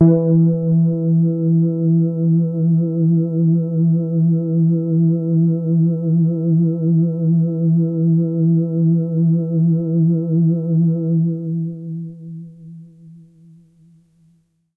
Noisy Mellowness - E3
This is a sample from my Q Rack hardware synth. It is part of the "Q multi 007: Noisy Mellowness" sample pack. The sound is on the key in the name of the file. The low-pass filter made the sound mellow and soft. The lower keys can be used as bass sound while the higher keys can be used as soft lead or pad. In the higher region the sound gets very soft and after normalization some noise came apparent. Instead of removing this using a noise reduction plugin, I decided to leave it like that.
bass, electronic, lead, mellow, multi-sample, soft, synth, waldorf